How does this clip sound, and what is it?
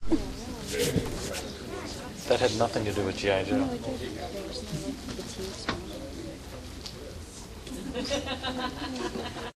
star trek5
A trip to the movies recorded with DS-40 and edited with Wavosaur. Audience ambiance before the movie.